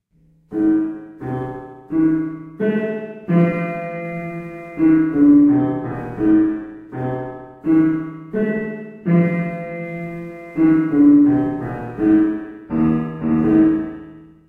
Music Classic Horror Tune vers.3
A music effect I made on my piano. The 101 Sound FX Collection.
piano, tune